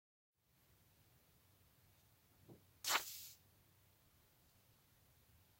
a piece of paper landing on soft carpet. recorded on a phone (probably very low quality). I made this because there just wasn't a ton to pick from and I needed paper sounds for an animation.
soft, gentle, falling, rustling, paper, wooshing